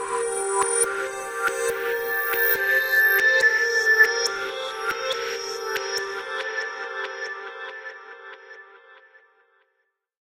Short intro / sample made in Ableton..